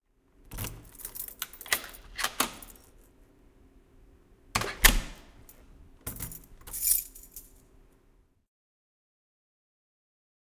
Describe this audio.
Keys in door, door opens and closes.